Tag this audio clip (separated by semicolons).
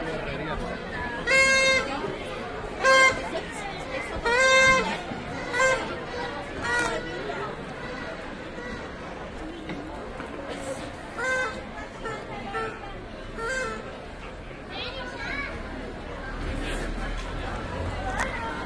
winter; spain; street; christmas; children; binaural; city; field-recording; ambiance